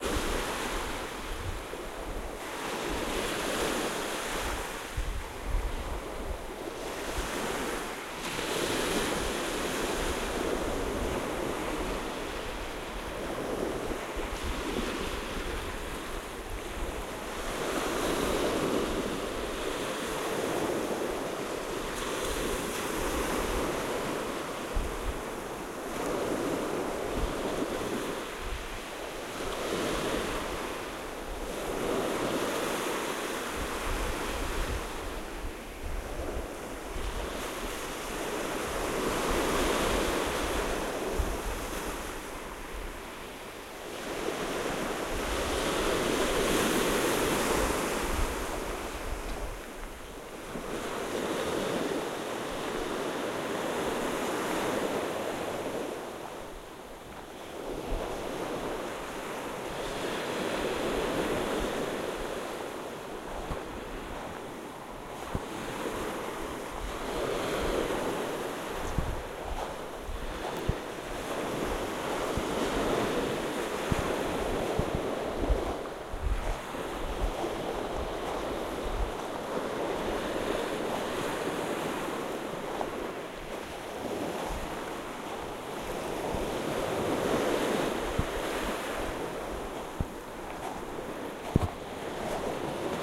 breaking waves
Waves breaking on shore. Southern France, near Palavas-les-Flots, 2006.
ambient, shore, water, waves